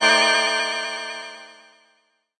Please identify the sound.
PPG 008 Dissonant Space Organ G#2
This sample is part of the "PPG
MULTISAMPLE 008 Dissonant Space Organ" sample pack. A short dissonant
chord with a sound that is similar to that or an organ. In the sample
pack there are 16 samples evenly spread across 5 octaves (C1 till C6).
The note in the sample name (C, E or G#) does not indicate the pitch of
the sound but the key on my keyboard. The sound was created on the PPG VSTi. After that normalising and fades where applied within Cubase SX.
chord, dissonant, multisample, organ, ppg